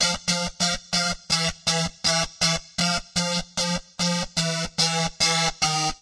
Live Dry Oddigy Guitar 06
bass, bitcrush, distorted, free, grit, guitars, live